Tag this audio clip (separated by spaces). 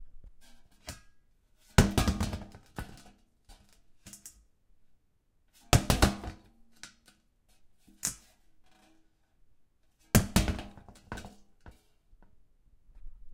thump hollow metallic drop clunk bump